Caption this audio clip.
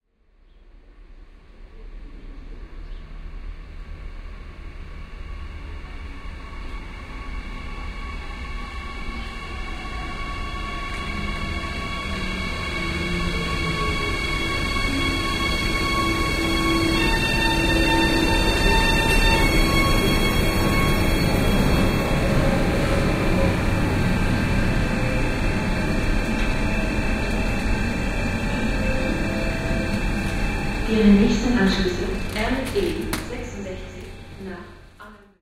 Electric Train Pulls Up
A Deutsche Bahn DB electric RE (regional) train pulling up at a station (Gesundbrunnen, Berlin).
Recording binaurally using Primo EM258s into a Sony PCM A10.